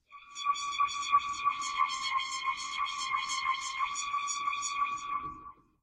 Creaking Metal - Eerie
Squealing rapidly switching between the left and the right speaker channels, making an eerie, alien-like effect.
This sound is a modification from the sound "Creaking Metal Desk".
Recorded with: Shure SM57 Dynamic Microphone.
Squealing, Squeaking, Effect, Alien, Sci-Fi, Metal, Eerie